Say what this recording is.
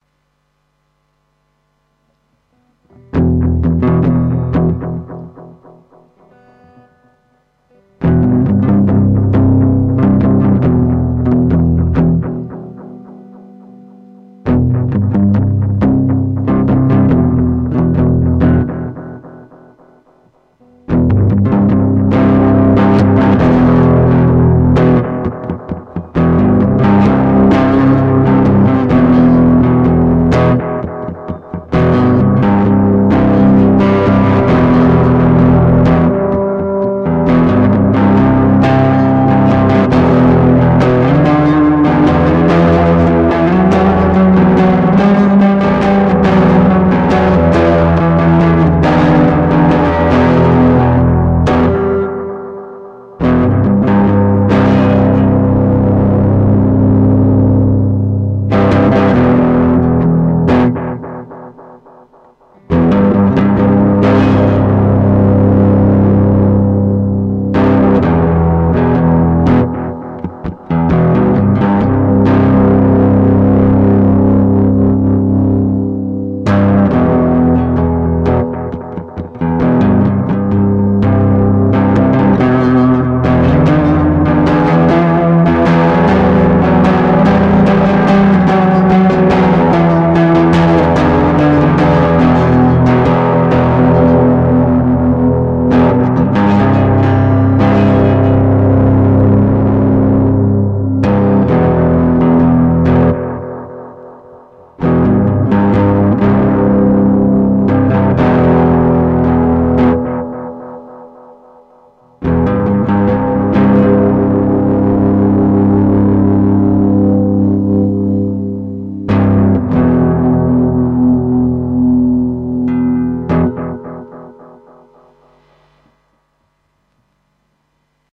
Bad Man
Grim solo with heavy overdrive and delay effect. Melancholic and atmospheric. Key E minor.
Made by Valenitn Sosnitskiy.
astmosperic, electric, experimental, guitar, instrumental, melancholic, melodical, music, psychedelic, rock